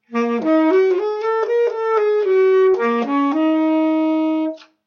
sax-phrase-T5
Tenor sax phrase
sax, tenor-sax